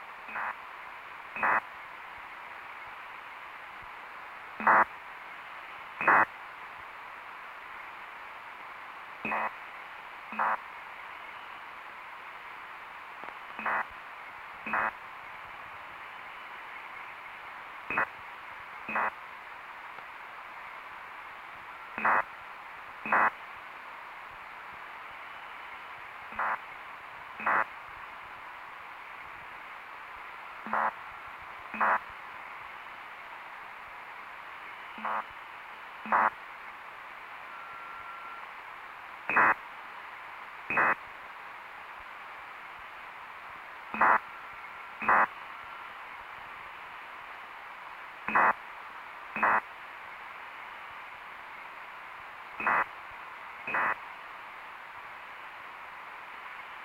Various recordings of different data transmissions over shortwave or HF radio frequencies.
drone, radio, shortwave